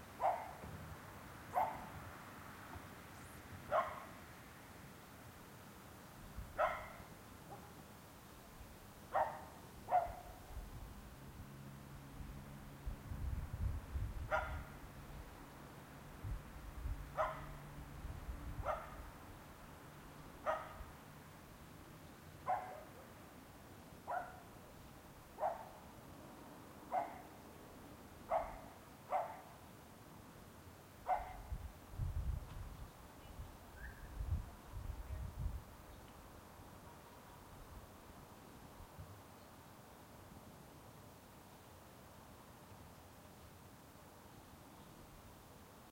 A21 Night Close dog
Night time atmosphere recorded near Biggin Hill in Kent, England, I used a Sony stereo condenser microphone and recorded onto a Sony Mini disc. The A21 to Hastings is 200 metres away.
field-recording, atmosphere, ambience